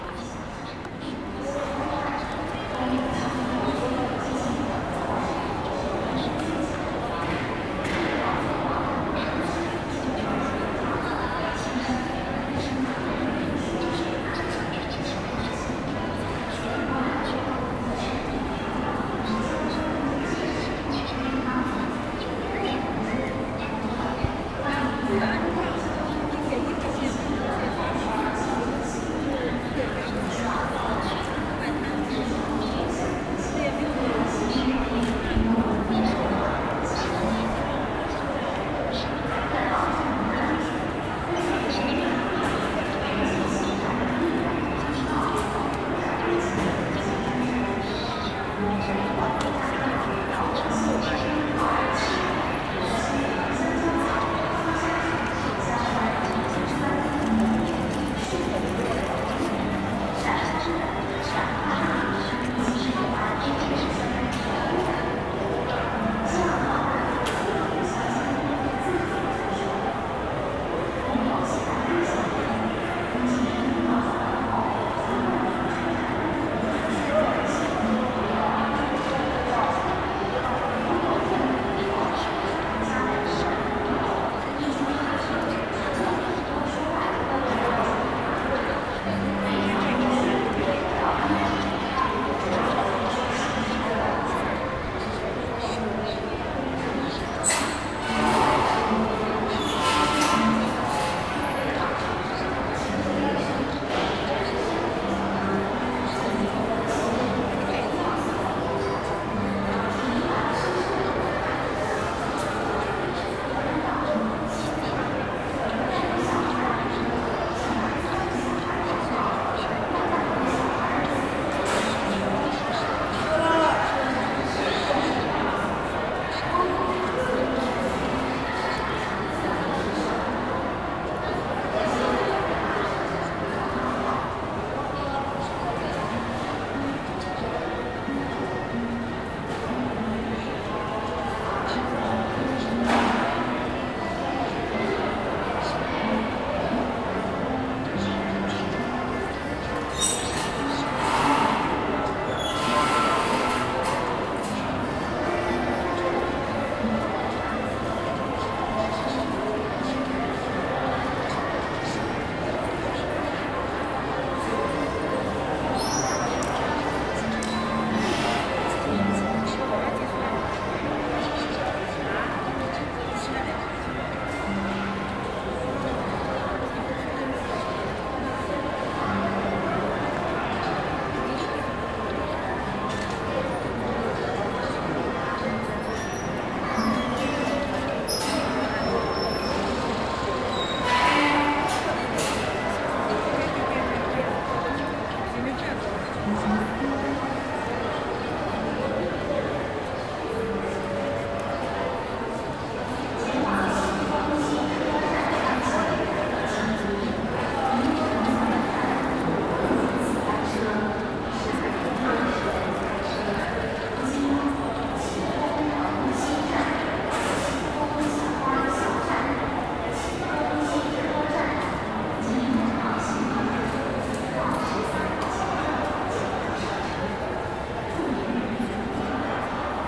Shanghai Railway Station field recording
Background, Shanghai, Railway, Crowd, Train, Ambient, Bus, Platform